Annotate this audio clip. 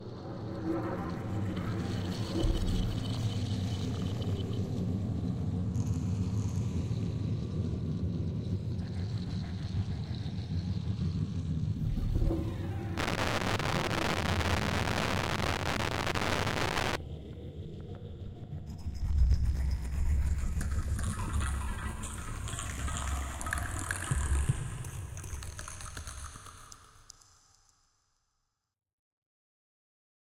rotative mezclas
balls, edited, free, rotative